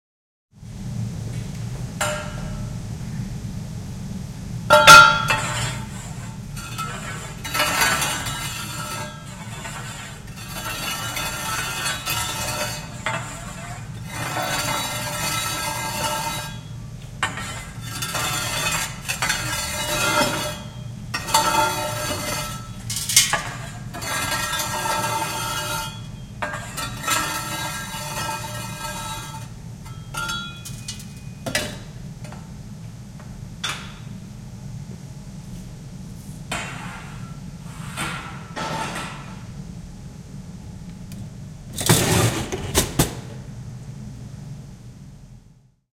Krematorio, tuhka kerätään uunista metallisella kolalla.
Paikka/Place: Suomi / Finland / Helsinki
Aika/Date: 30.09.1997